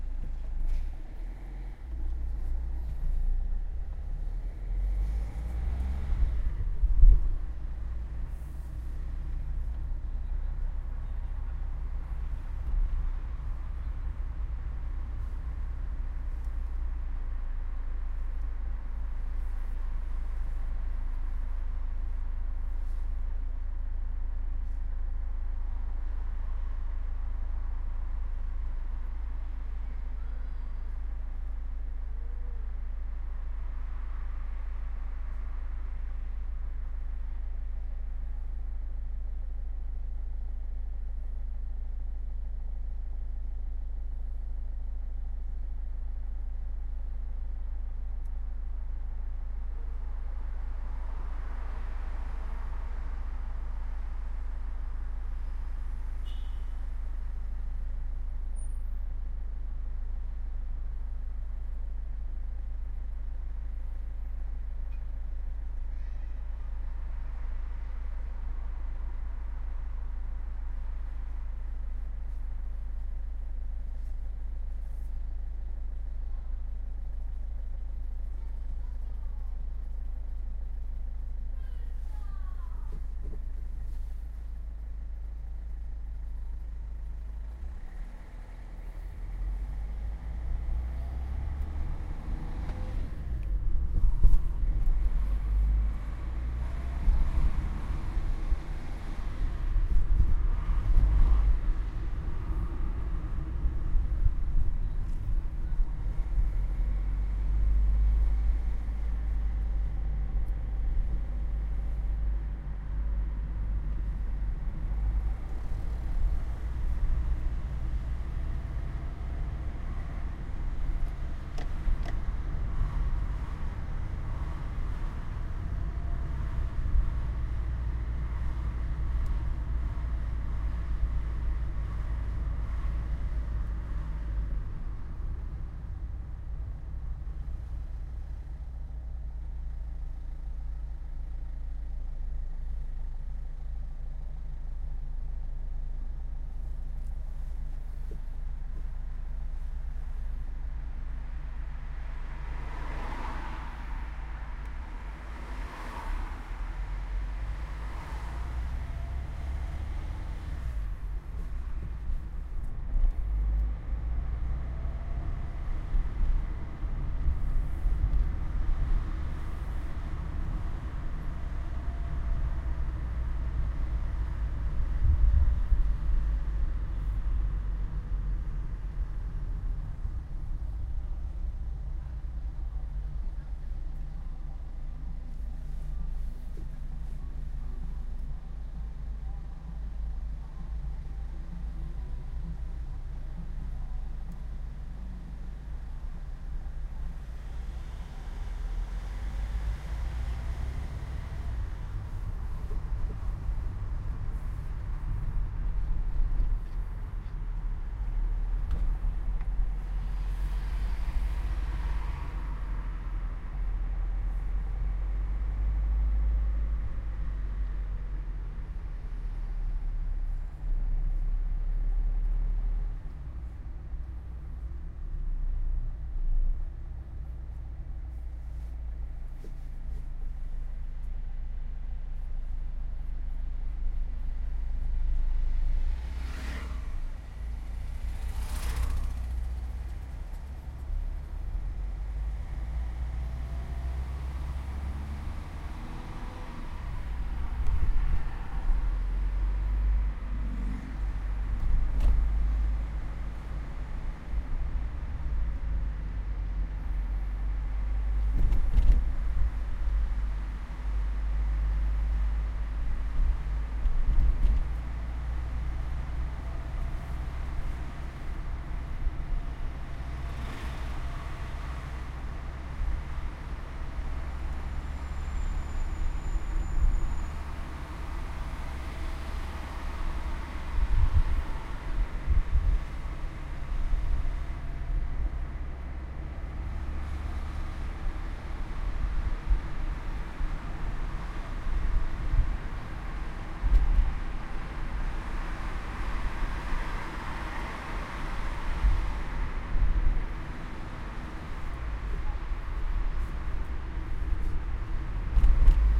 Car Interior gear downs in windows
Sound from the interior of a car gear downs windows
car, gear, windows, downs, interior